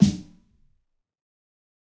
Fat Snare EASY 013
This is The Fat Snare of God expanded, improved, and played with rubber sticks. there are more softer hits, for a better feeling at fills.
realistic, snare, rubber, drum, god, sticks, fat, kit